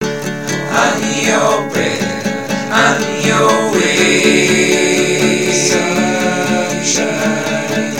XEX Mixdown
A collection of samples/loops intended for personal and commercial music production. For use
All compositions where written and performed by
Chris S. Bacon on Home Sick Recordings. Take things, shake things, make things.
Indie-folk, whistle, drum-beat, looping, loops, sounds, original-music, indie, bass, drums, harmony, free, piano, acapella, rock, samples, voice, vocal-loops, beat, acoustic-guitar, guitar, synth, melody